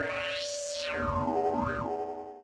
sound of my yamaha CS40M
synthesiser, fx, sound, sample